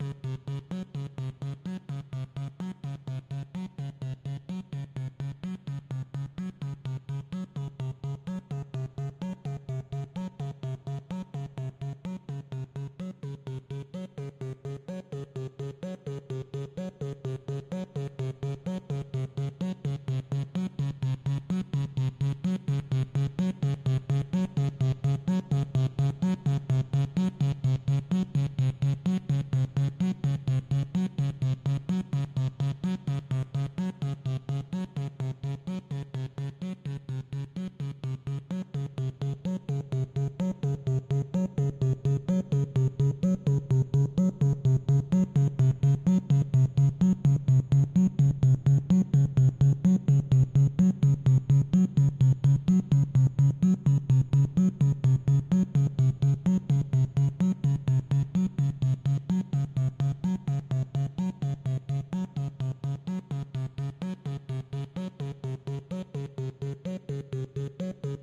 ladder arp
Part of 7 sounds from Corona sound pack 01\2022. All sounds created using Novation Bass Station II, Roland System 1 and TC Electronics pedal chain.
Unfinished project that I don't have time for now, maybe someone else can love them, put them together with some sweet drums and cool fills, and most of all have a good time making music. <3